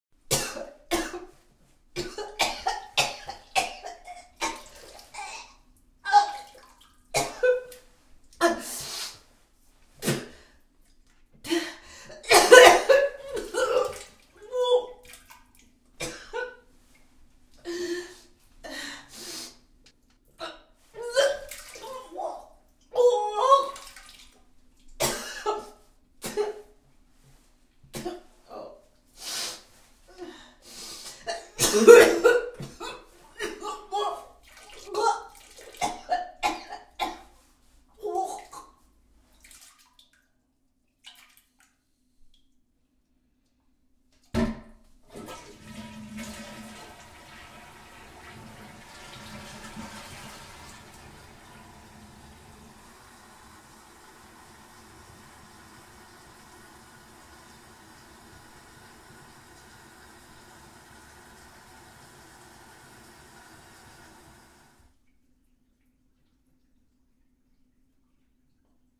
Woman vomiting into toilet
Professional actress "throwing up" into toilet
nausea, throwing, up, vomit